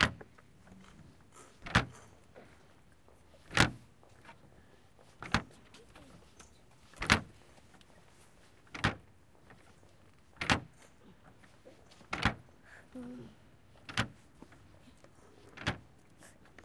Sonicsnaps-OM-FR-couvercle-de-poubelle
Playing the bin covers
Paris, sonic, field-recording, TCR, snaps